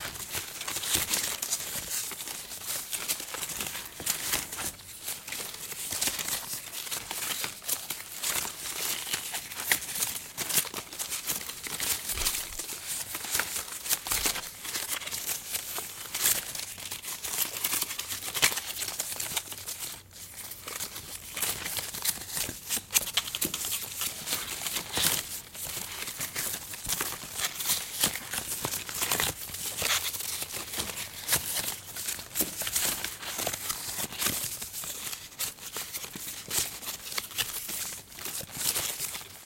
FXSaSc Moving Soft Plants Leaves Close ASMR 1
Moving Soft Plants Leaves Close ASMR 1
Recorded with Zoom H6, XY